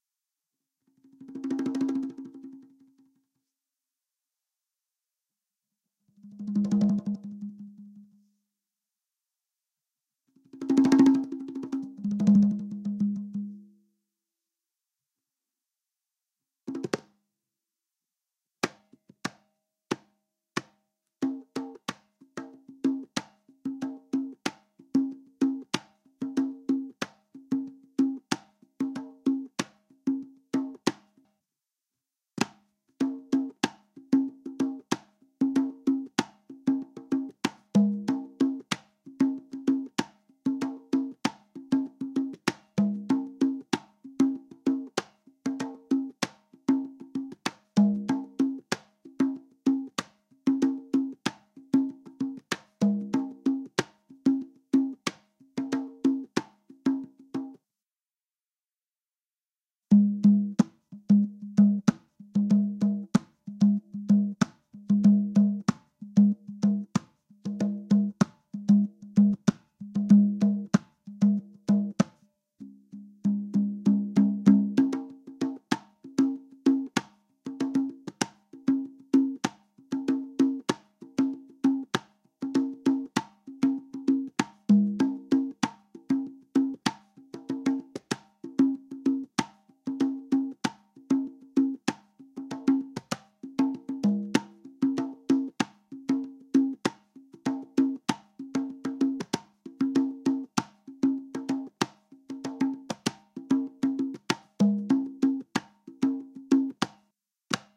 Congas simple groove 2 - What's going on
Some bars of conga beats inspired by the What's going on beat (Marvin Gaye), stereo separated conga and quinto, close miked. A few rolls also.